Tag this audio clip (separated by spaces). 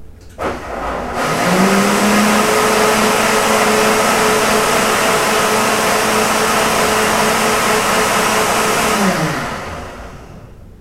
grinding mixer